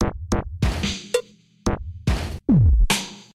experimented on dubstep/grime drum loops
dubstep, drum, loop, grime, dub, 140, 140bpm